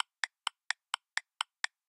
Tic Toc
The sound of a ticking clock simulated by my voice. Recorded with a Beyerdynamic Opus 29 S microphone.
Clock, Household, Time, Voice